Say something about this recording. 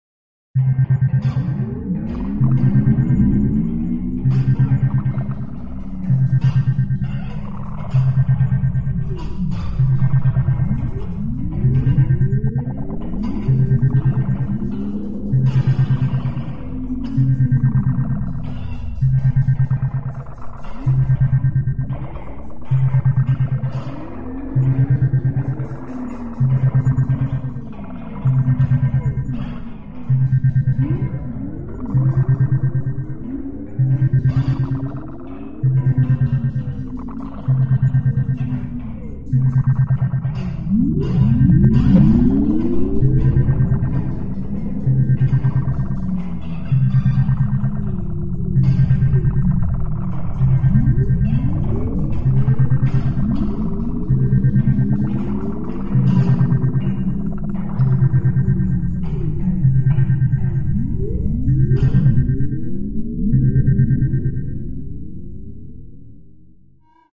Interior Spaceship
A sound montage of my own creation. It incorporates sounds I recorded in a garage (heavily edited) as a base, before building up various synthesizer beeps and warbles on top of it.